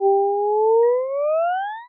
This is a sound effect I created using ChipTone.